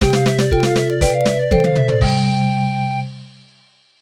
A short musical segment that could be used as a success sound or to denote the ending of the level of a child's game.